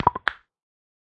a mixture of a few different bone/jaw popping soundsprocessed w/ a low pass filter